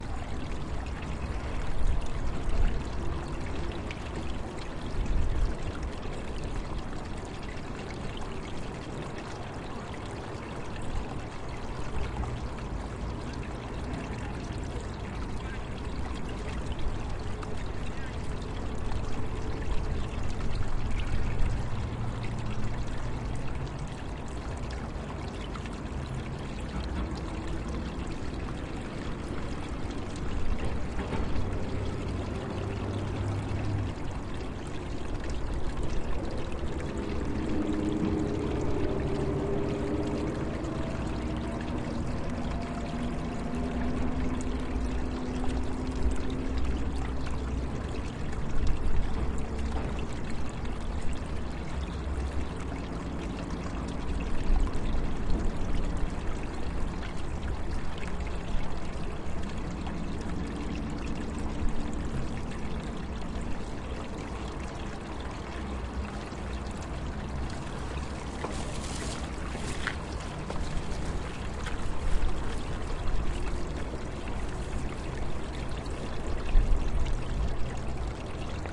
water flow between rolls
Sound of water flow between tow rolls. This water flows from wastewater tube the riverside near Leningradkiy bridge.
Recorded: 2012-10-13.